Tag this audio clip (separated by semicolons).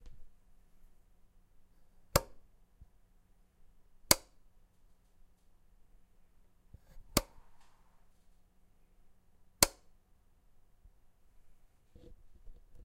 switched; toggle; push; button; switches; electric; light; press; switching; click; electrical; switch; electricity; ON; buttons; flip; off